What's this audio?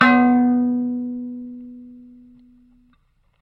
Tones from a small electric kalimba (thumb-piano) played with healthy distortion through a miniature amplifier.
amp, bleep, blip, bloop, contact-mic, electric, kalimba, mbira, piezo, thumb-piano, tines, tone